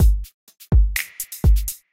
dance hall drum1
Part of the caribbean delights pack, all inspired by out love for dancehall and reggae music and culture.
4-bar, simple, thumping, dance-hall